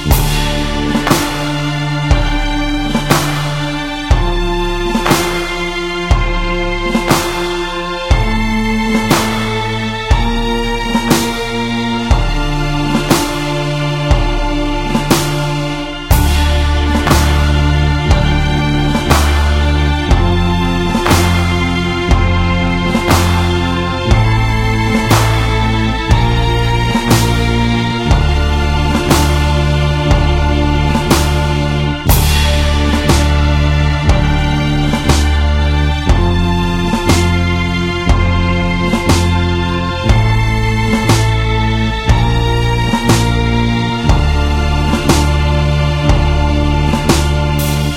Loop Little Big Adventure 02
A music loop to be used in fast paced games with tons of action for creating an adrenaline rush and somewhat adaptive musical experience.
Video-Game, game, games, indiegamedev, gaming, gamedev, victory, music, videogame, loop, indiedev, battle, music-loop, war, gamedeveloping, videogames